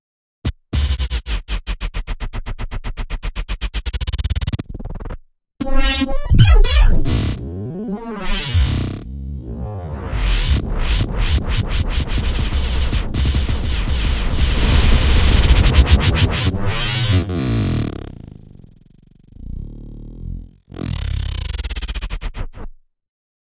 Rbreak-gran
This is a record braking to which I applied a granulizer effect.
granulized, brake, record, effect